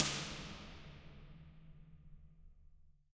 Concrete Tunnel 06 Right
Impulse response of a long underground concrete tunnel. There are 7 impulses of this space in the pack.